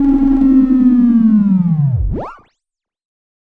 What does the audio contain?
Attack Zound-13
Similar to "Attack Zound-03" but with a long decay and a strange sound effect at the end of the decay. This sound was created using the Waldorf Attack VSTi within Cubase SX.